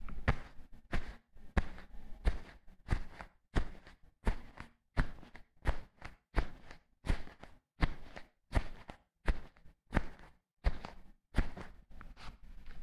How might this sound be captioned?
Walking Through Snow.L
Feet walking through snow